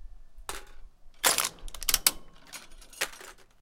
Crushing an aluminum can in the backyard with our can crusher.
can, crushing, aluminum, crunch, metallic